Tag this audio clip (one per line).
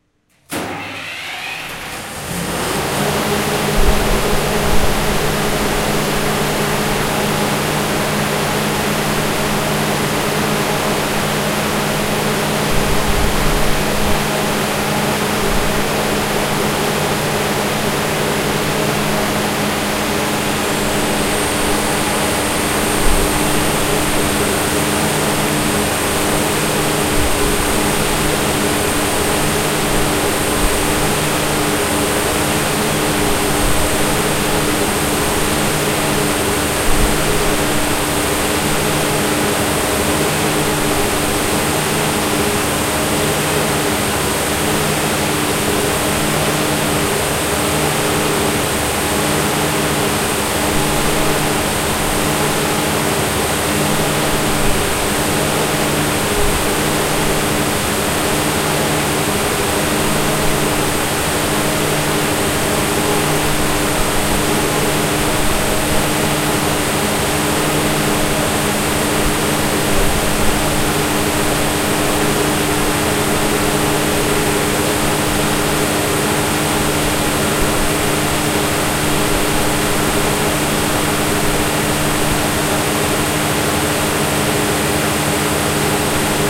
Compressor
diesel
engine
generator
Ignition
motor
start